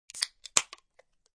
61-destapar lata
when you open a soda
bottle, open, refresh